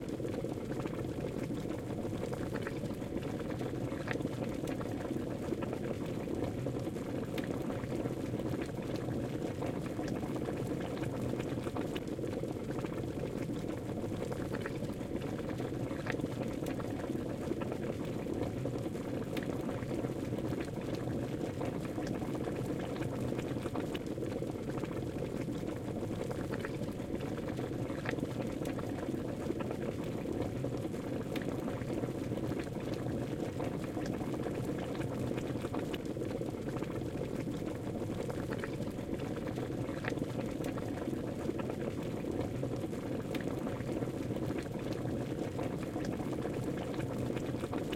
Boiling water
This recording is of water at boil within a kettle with the lid opened while it boils. The sound is of the water as it has begun to boil and bubbles violently.
This was recorded using the XYH-6 microphone my ZOOM H6 placed above the kettle aimed below into the open lid.
Clip Gain used during Post.